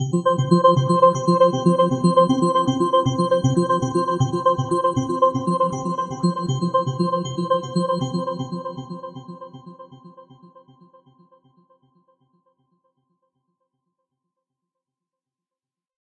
A synth arpeggio.
arppegio, synth